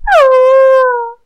Dog howling in pain (no animals were harmed - this sound was performed by a human female).
Performed and recorded by myself.